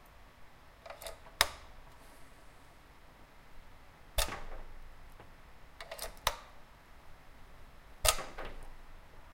Jack cable plug-in
This is a sound of a cable jack being connected and disconnected from an input at MTG (UPF). MTG is a research group specialised in audio signal processing, music information retrieval, music interfaces, and computational musicology.
cable, input, jack, mtg, plug-in, upf